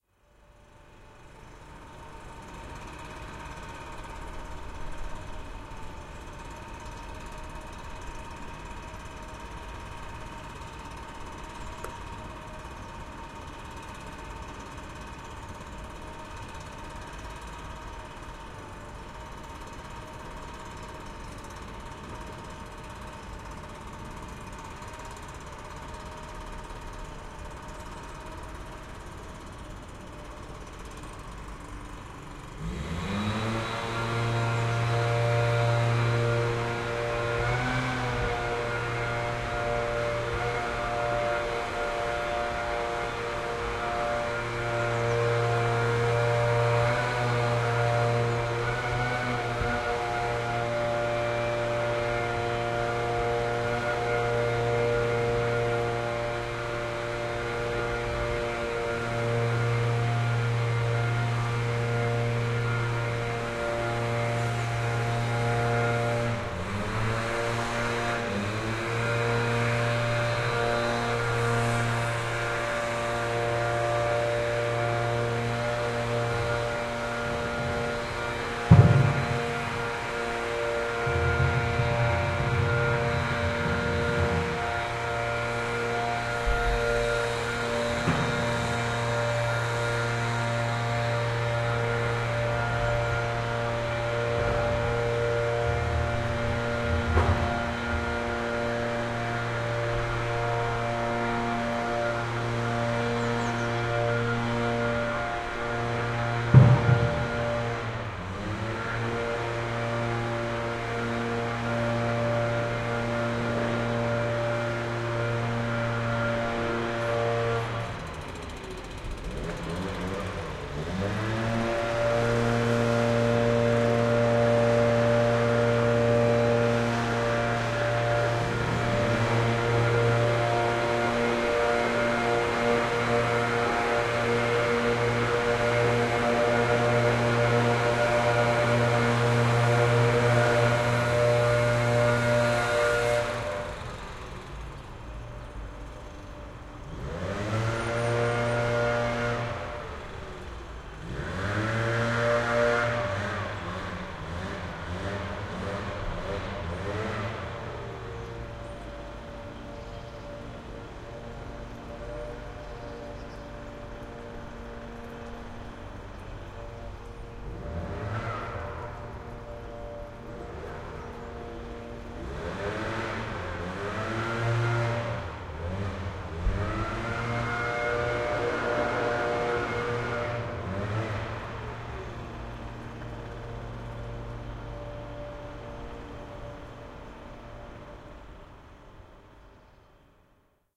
Leafblowing (Extract)
A extract from a longer recording of work men blowing leaves in garden.
Recording with Zoom H5 and omnidirectional microphones from Micbooster
atmosphere, drone, field-recording, garden, machinery, noise, workmen